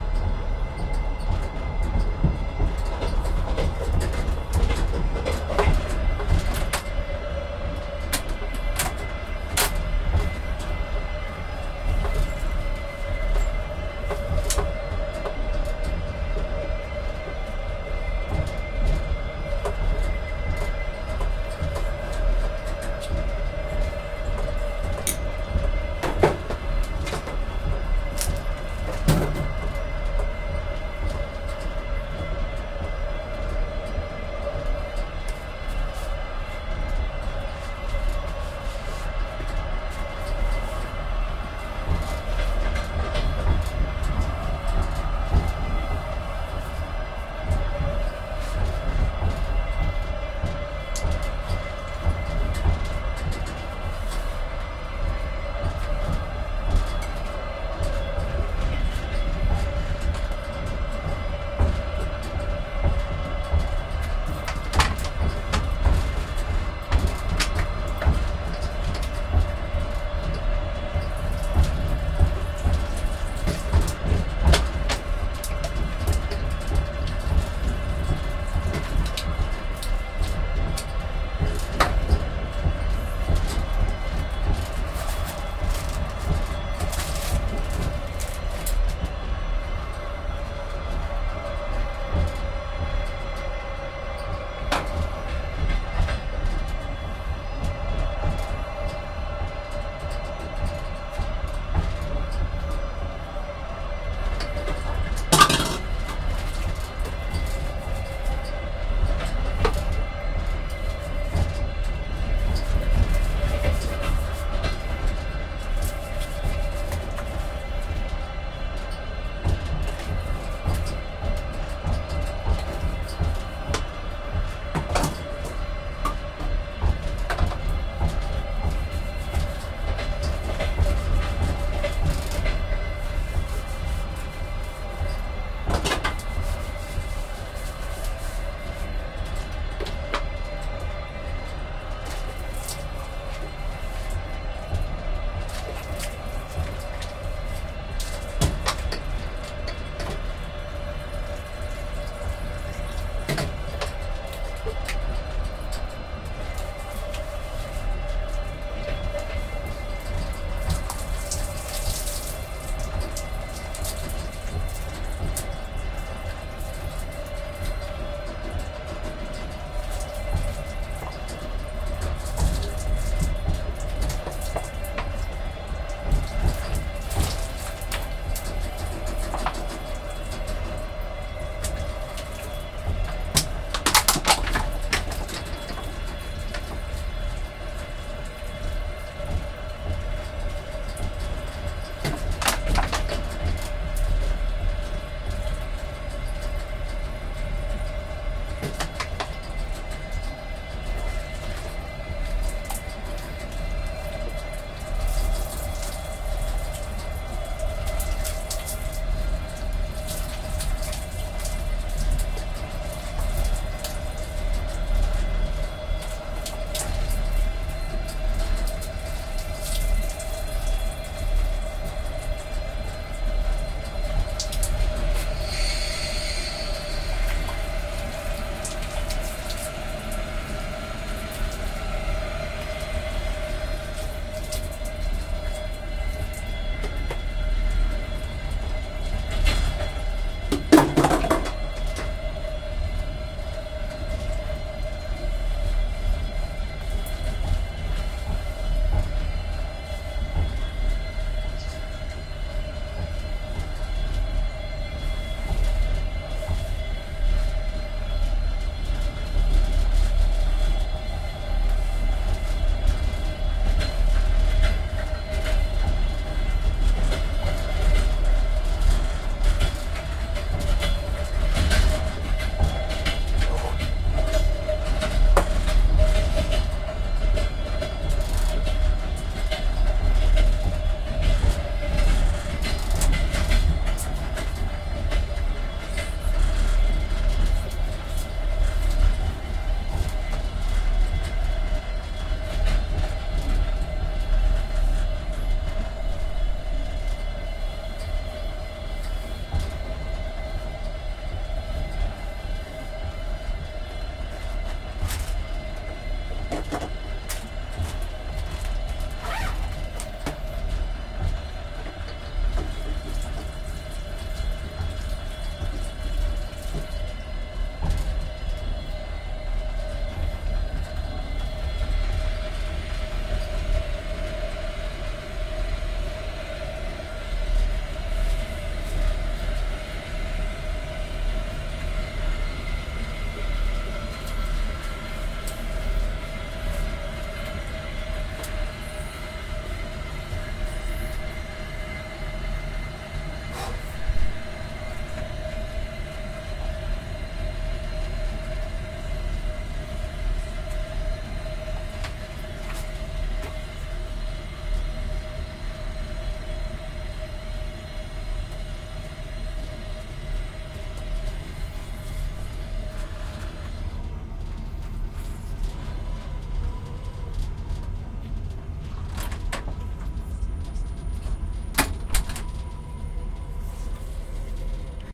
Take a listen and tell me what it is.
Bathing upright in the train toilet while it is moving. Undressing, placing clothes around on suspenders, pouring water into a flask. A body is soaped and washed. Recorded with Tascam DR-40.